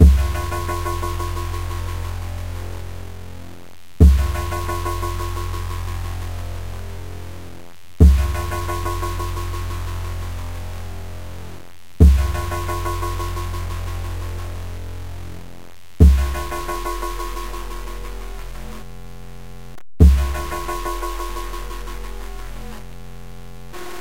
CINEMATIC Sound Effect for Short Film Previews
An adrenaline sound created to emphasize action in trailers or dramas in short film trailers. Much luck!
- Allan k.Zepeda
adrenaline, bass, cinema, cinematic, dramatic, film, Hollywood, Movie, orchestral, short, soundtracks, trailer